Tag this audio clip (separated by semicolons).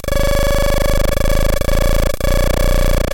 noise; APC; Atari-Punk-Console